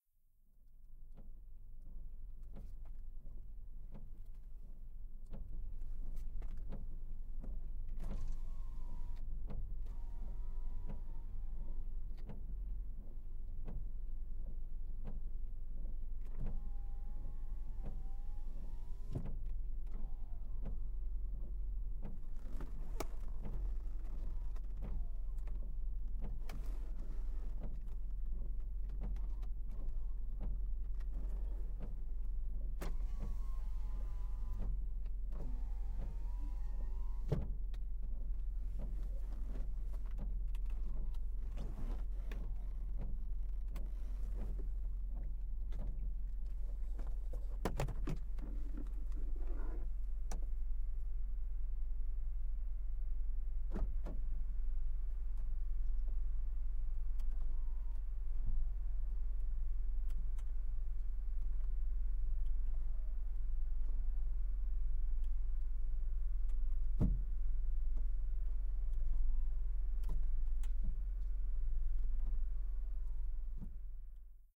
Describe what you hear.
Please note to engage END FIRE in decode (the mic was pointed for on Z axis and not compensated for during record). Interior backseat POV. honda civic 2006.